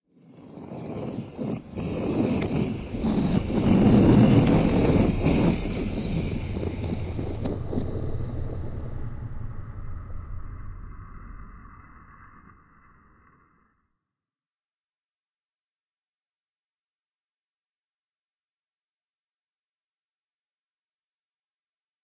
Giant flying airship
The sound of a giant airship flying by. The actual recording is an empty plastic bottle scraping on a wall. Recorded on Samsung S5 and processed in Ableton Live 9. Recorded in an apartment in Watford, England.